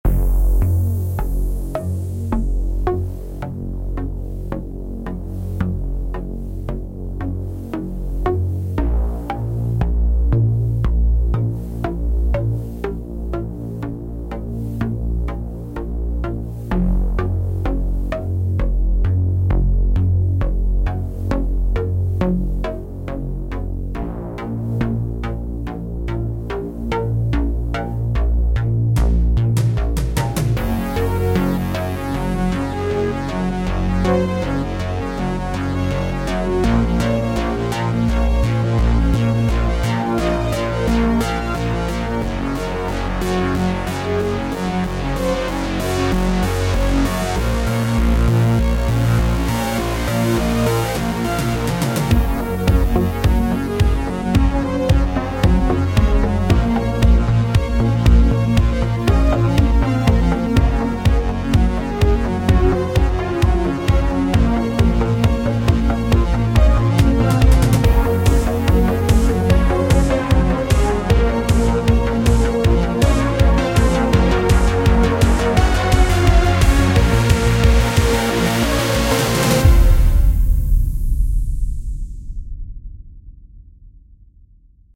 A short cinematic music track that speeds up over time.
Enjoy!